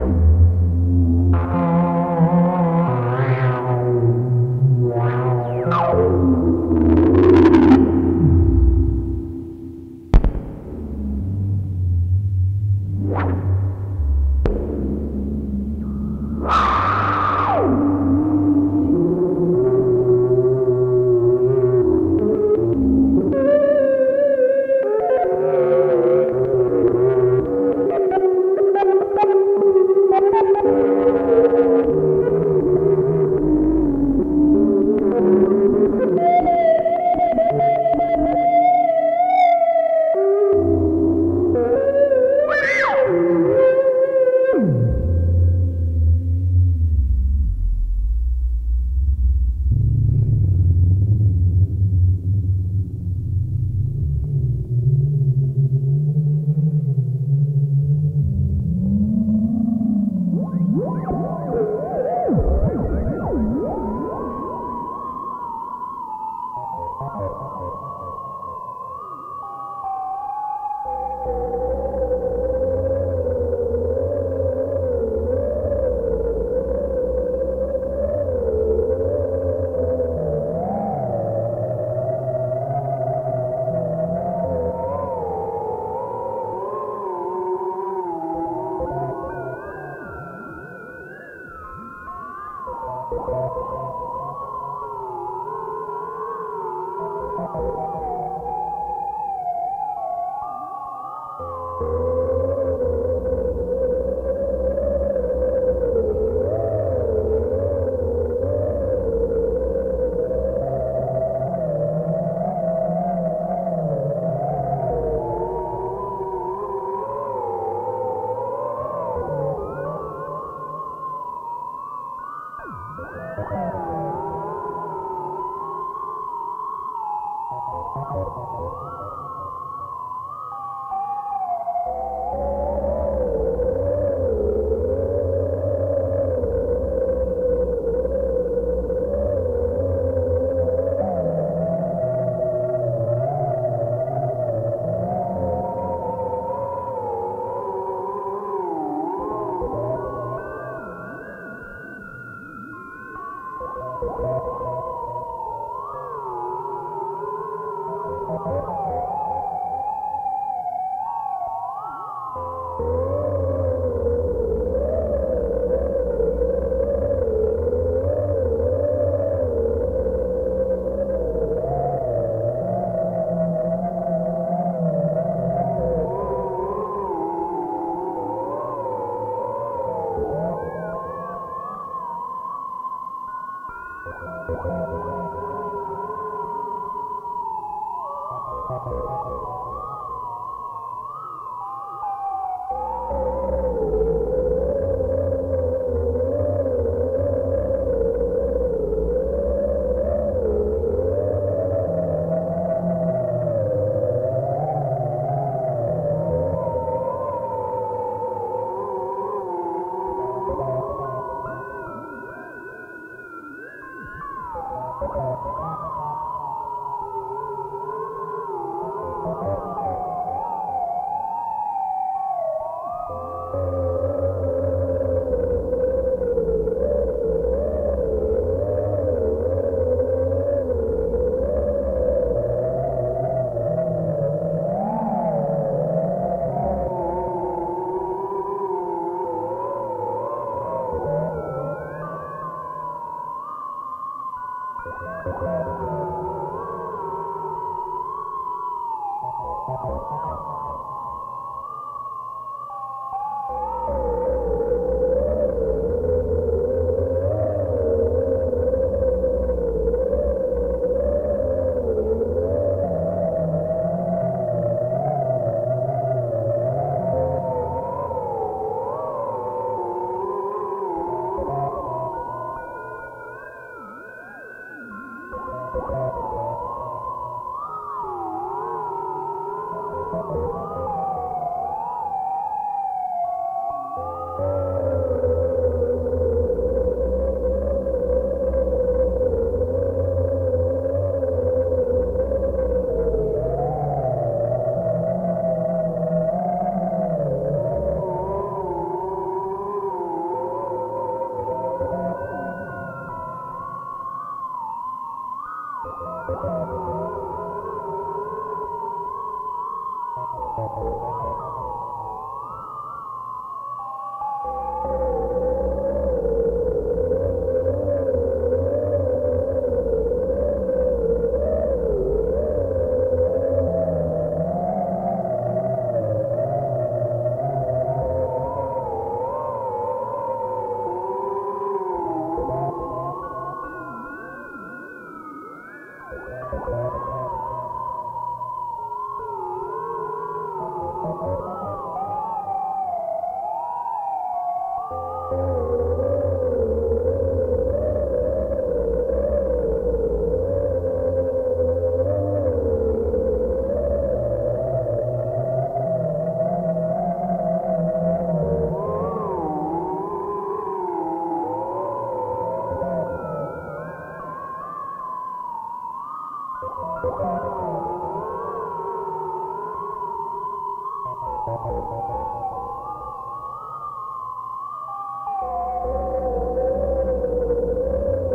Korg Monotribe processed by a Doepfer A-100.(BBD and A-199 Spring Reverb)
Recorded with a Zoom H-5 and transcoded with ocenaudio.